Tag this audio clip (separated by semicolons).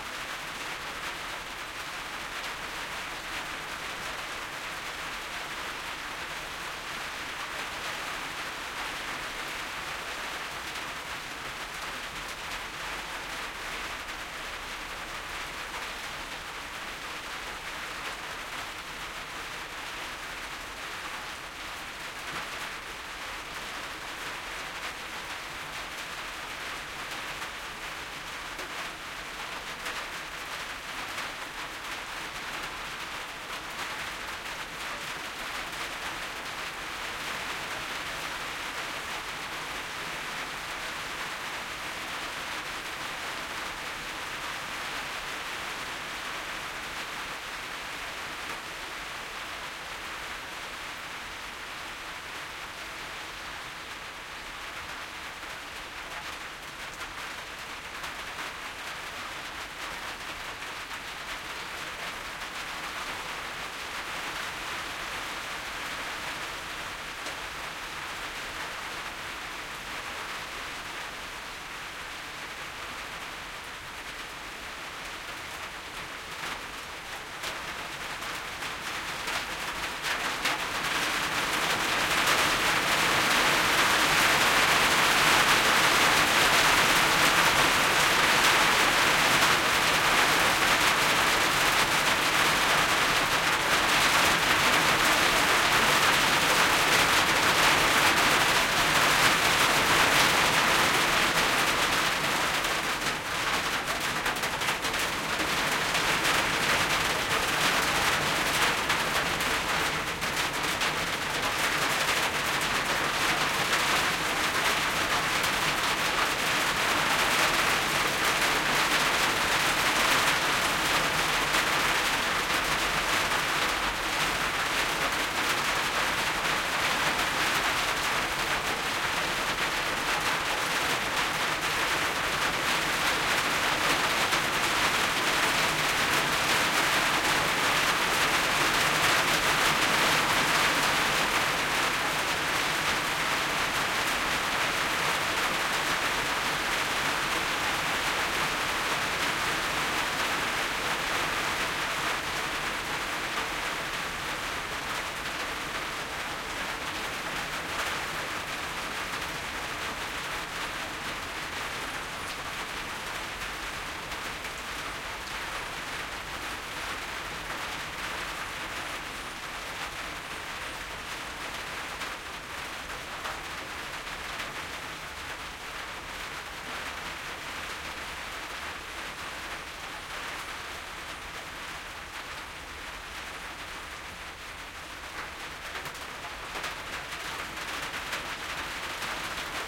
field-recording; nature